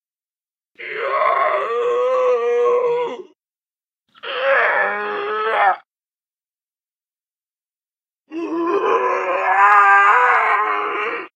A few mostly-raw zombie growls. The last one is the best in my opinion.